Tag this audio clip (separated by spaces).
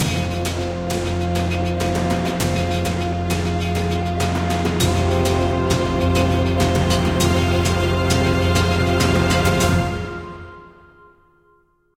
ending epic filmscore filmusic orchestral